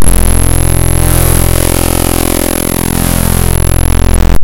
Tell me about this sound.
chopper flying over
Sample made by importing non-audio files (.exe, .dll, etc.) into Audacity as raw data. This creates a waveform whose duration depends on its file size. Zooming in, it's very easy to find bits of data that look different than the usual static. This sample was one of those bits of data.
audacity, chip, chiptune, clipped, distorted, glitch, helicopter